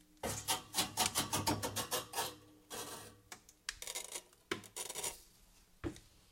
Hit metal hallow object